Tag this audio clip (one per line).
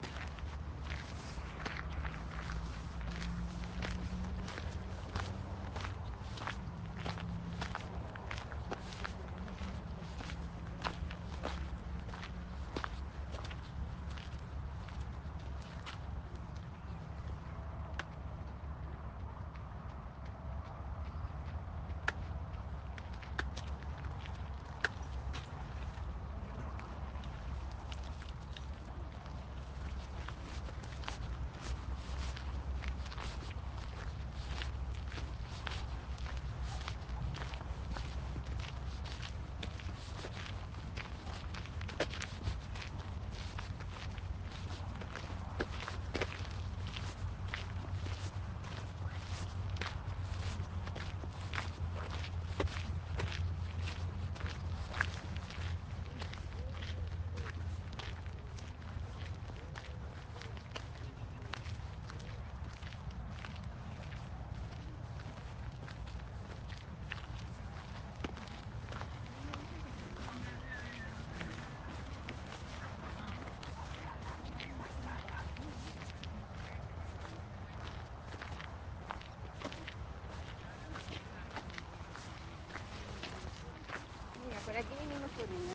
ambient
soundscape